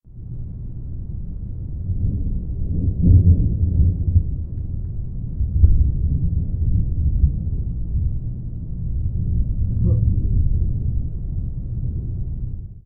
Distant thunder 02
This is one of several segments of a distant thunderstorm the U.S. West Coast experienced very early in the morning (2-3am). I recorded this from Everett, Washington with a Samson C01U USB Studio Condenser; post-processed with Audacity.
distant, field-recording, lightning, north-america, storm, thunder, west-coast